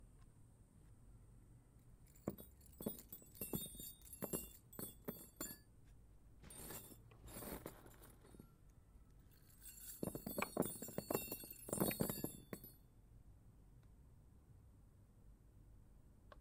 CLANGING AND DROPPING METAL IN THE DIRT
Various metal sounds impacting on soft ground.
created by needle media/A. Fitzwater 2017